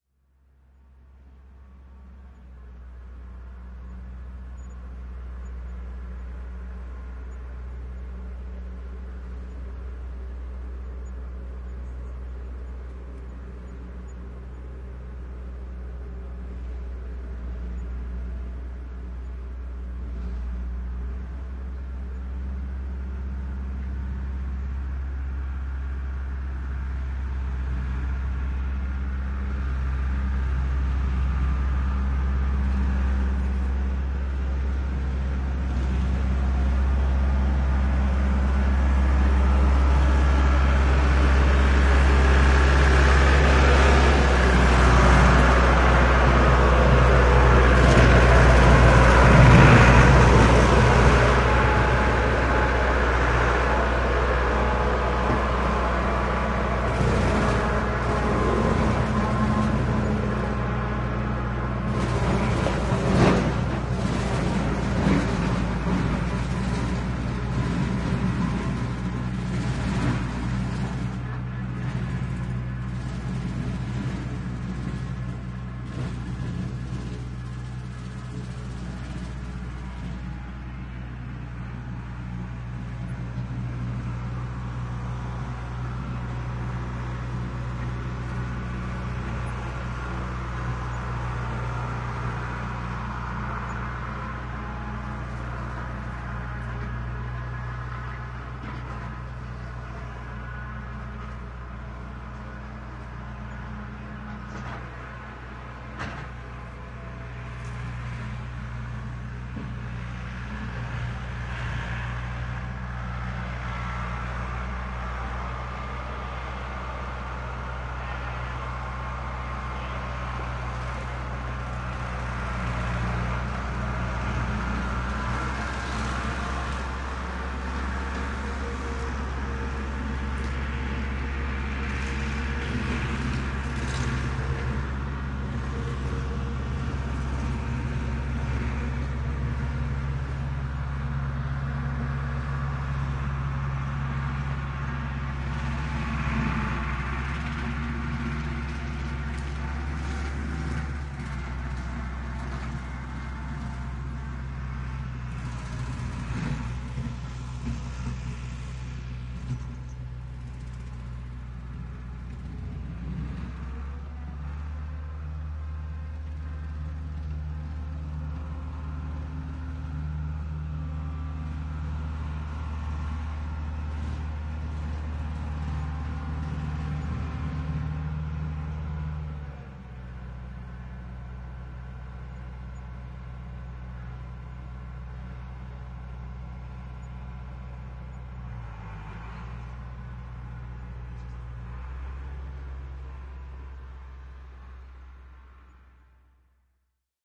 A stereo field-recording of a Renault tractor on rough terrain with a flail topper operating behind it. Rode NT4 > FEL battery pre-amp > Zoom H2 line-in.